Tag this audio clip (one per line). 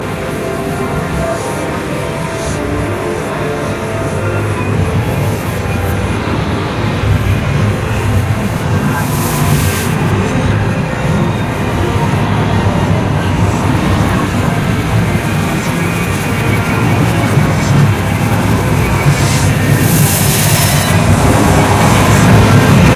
bad
drama
ending
fear
frightful
horror
horror-effects
horror-fx
movie
terror
thrill
video